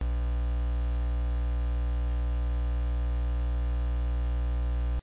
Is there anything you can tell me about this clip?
Mike noise
electronic, Hz, mike, noise